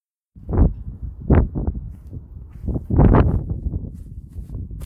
Recorded using a zoom h2n recorder. Strong wind. Edited in audacity.